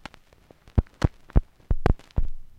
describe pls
Clicks and pops recorded from a single LP record. I carved into the surface of the record with my keys, and then recorded the sound of the needle hitting the scratches. The resulting rhythms make nice loops (most but not all are in 4/4).
noise, loop, glitch, record, analog